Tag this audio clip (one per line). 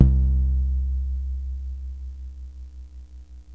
fm
portasound
pss-470
synth
yamaha